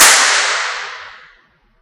stairway to ir1NR
Recorded with cap gun and DS-40. Most have at least 2 versions, one with noise reduction in Cool Edit and one without. Some are edited and processed for flavor as well. Most need the bass rolled off in the lower frequencies if you are using SIR.
response, ir, impulse, convolution, reverb